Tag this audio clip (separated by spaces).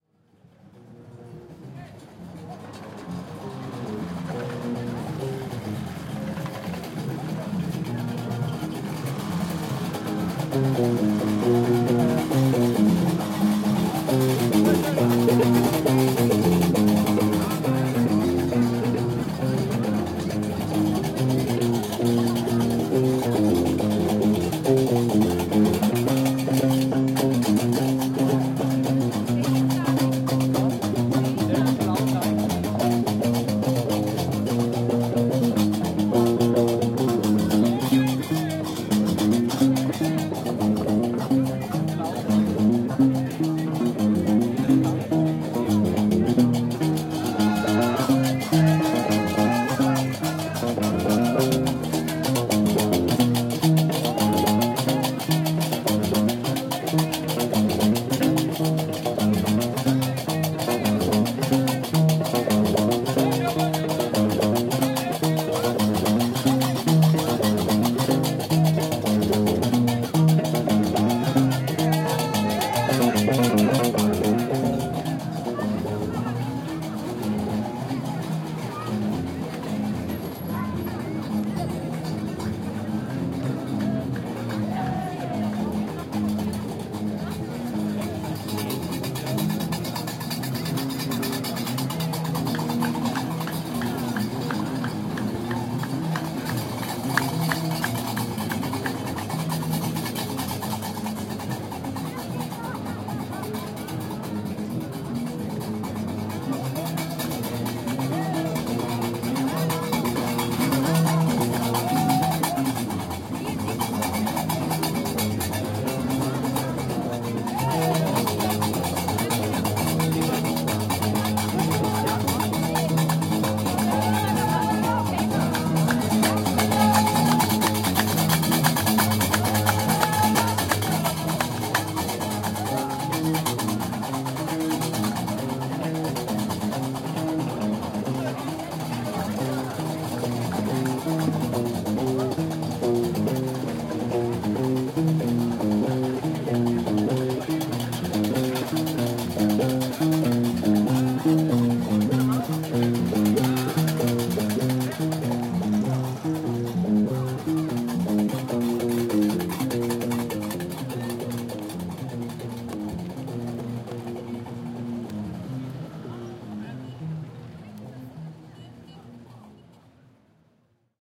Marrakech el street north Jamaa africa